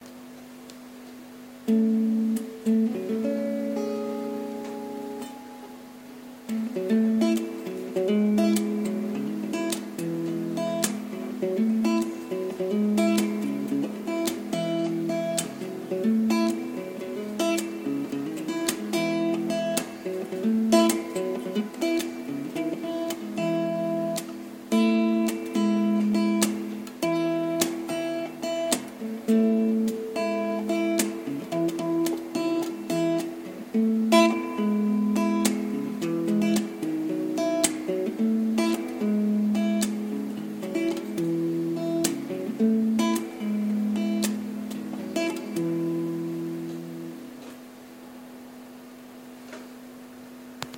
Uneek guitar experiments created by Andrew Thackray